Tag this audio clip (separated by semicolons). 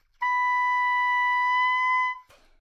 B5; good-sounds; multisample; neumann-U87; oboe; single-note